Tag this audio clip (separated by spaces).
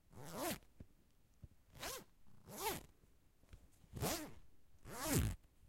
field-recording zipper movement